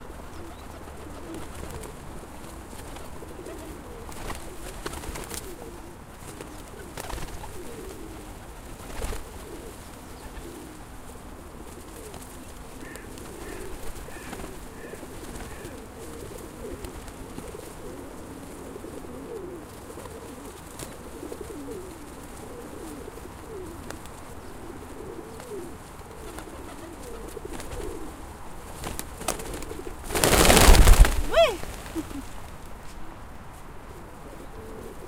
pigeons coo
Pigeons peck feed. Pigeons flutter a bit.
Recorded 2014-02-23.
Recorder: Tascam DR-40.
coo, flap, flapping, flutter, fluttering, pigeons, wings